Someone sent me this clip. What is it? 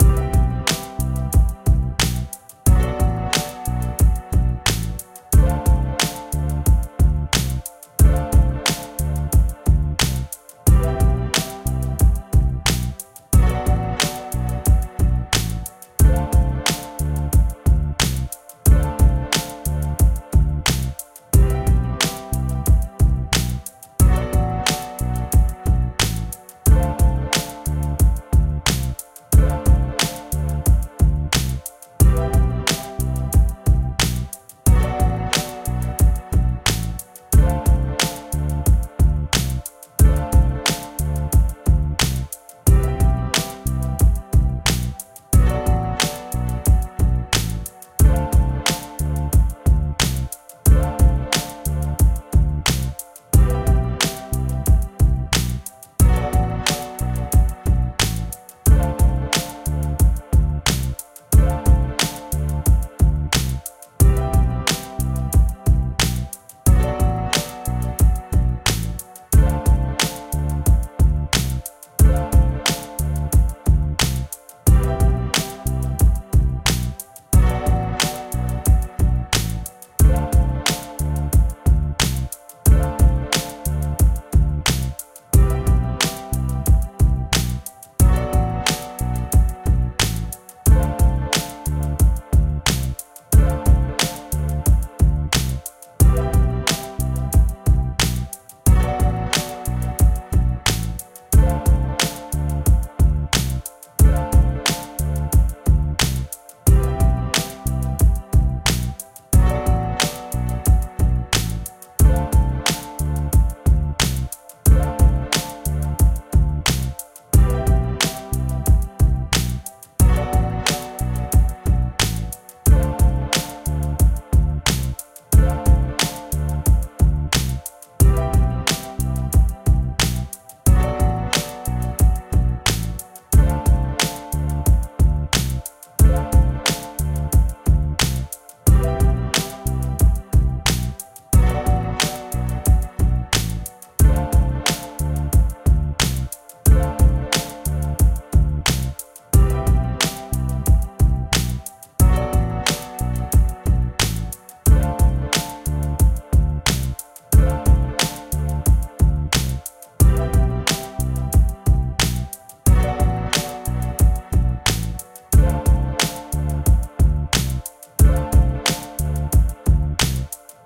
Rap loops 001 simple mix 2 long loop 90 bpm
loop, piano, 90bpm, loops, 90, drums, rap, hiphop, beat, hip, hop, drum, bass, bpm